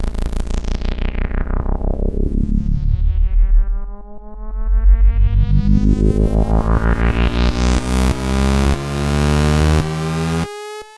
Flutter Down+Motor up 2PulseRISE
DSI prophet08 fx designs
sweeps, rises, prophet, house, fx